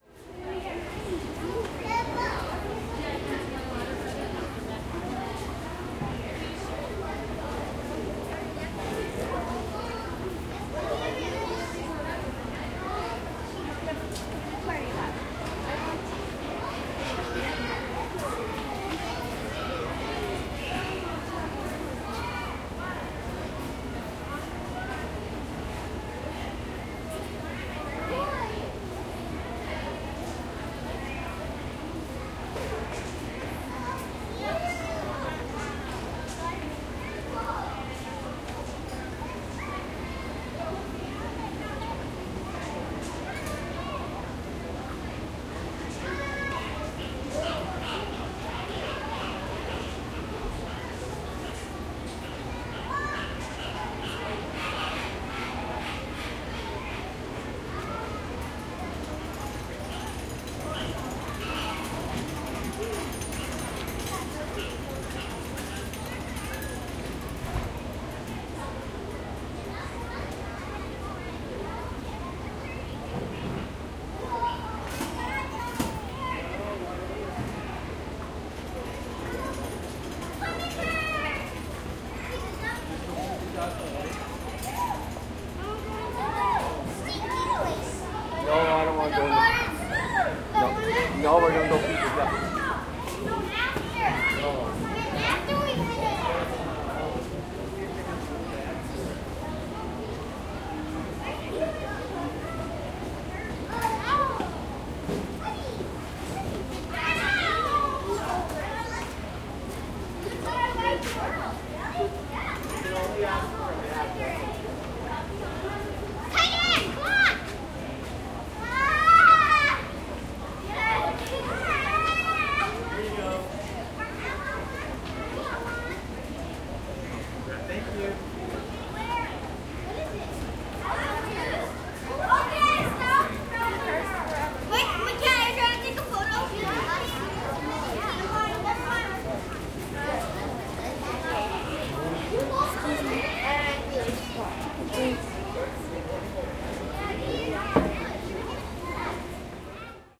Exterior ambience at Albuquerque BioPark Zoo. Childrens' voices. Footsteps. Recorded quad (L,R,LsRs) with a zoom H2n in surround mode.